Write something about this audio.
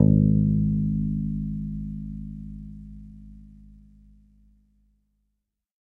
First octave note.
bass, electric, multisample, guitar